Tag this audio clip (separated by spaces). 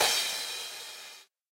tr glitch 707 mod bent